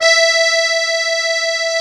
real acc sound
accordeon, keys, romantic